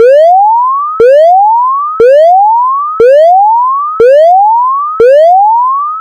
This is a loopable alarm sound that could be used for games/videos.
(Or anything else)
loop, alarm, warning, loud